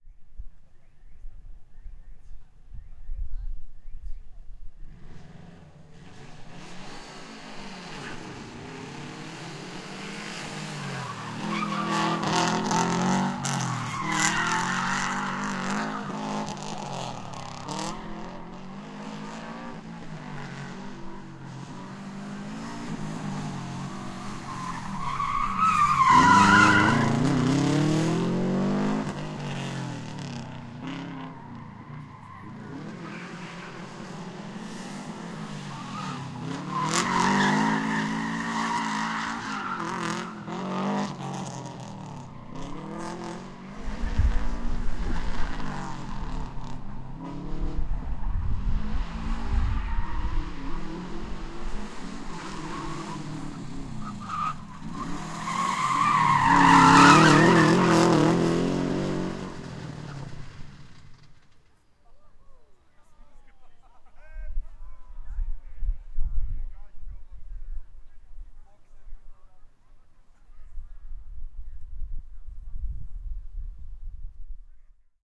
race car subaru screeching tires
car, race, screeching, subaru, tires